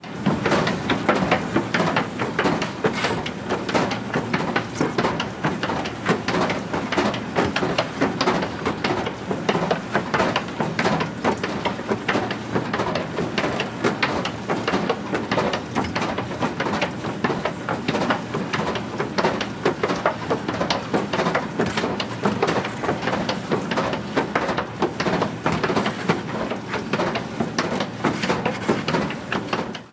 The sound of an automatic stair in the Parisian subway recorded on DAT (Tascam DAP-1) with a Sennheiser ME66 by G de Courtivron.
elevator, subway